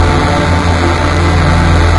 A rather nasty-sounding 2-second seamless loop of a modulated tone arrangement. I have no idea what you might use this for, but it seems unusual enough to warrant placing here. Created from scratch in Cool Edit Pro 2.1.
ambient, distorted, drone, loop, synthetic, tonal